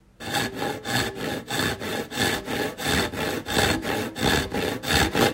A person that is busy sawing into a piece of wood for a project. This sound can also be used for a weird scraping sound.